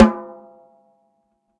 Share with no spring
shot, hat, spring, snare, no, splash